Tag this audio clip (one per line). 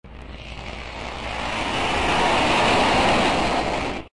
fi
Monster
horror
sci